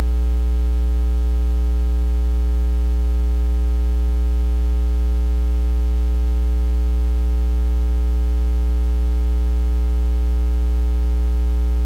Phone transducer suction cup thing on various places on an alarm clock radio, speakers, desk lamp bulb housing, power plug, etc. Recordings taken while blinking, not blinking, changing radio station, flipping lamp on and off, etc.
buzz, electricity, electro, hum, magnetic, transducer